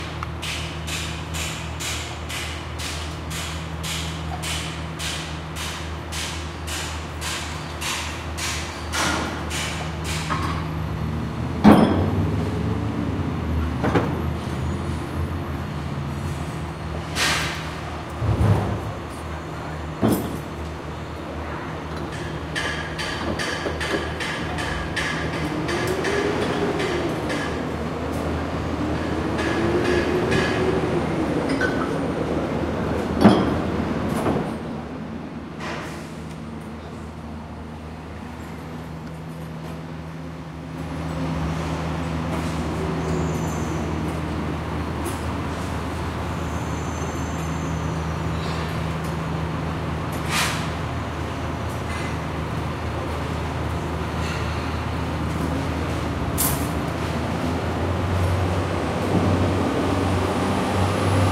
Condo Construction
recorded on a Sony PCM D50